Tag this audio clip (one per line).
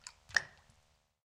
single
drop
water